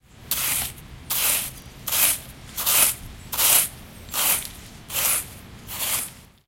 Sand picking shovel
Picking sand with a sandbox shovel.
child, playground, sand, sandbox, shovel